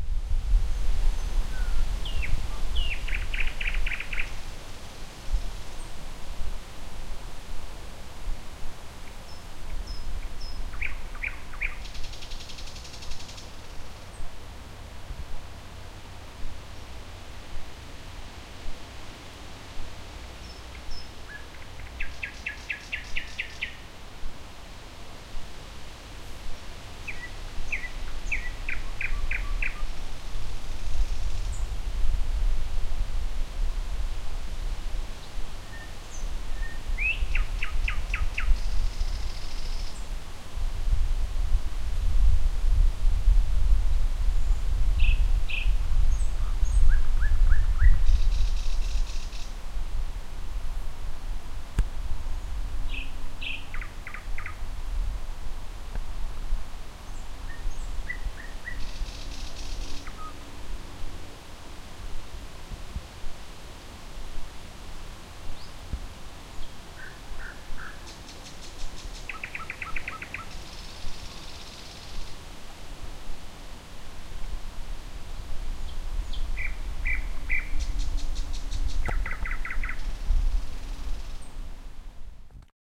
10 Nightinggale Smormosen
Song from distant nightinggales recorded on 17. May 2011 on Zoom H4 using the built-in microphones and a sweater for wind screen. Recorded on a rather windy night, occational wind noise from rustling leaves. Location - Smormosen, a public area with lakes and swamp north of Copenhagen, Denmark.
noise, wind